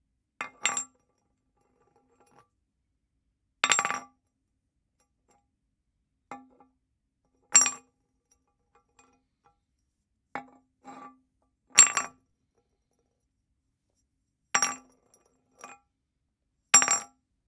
A small glass bottle being dropped and kicked around on concrete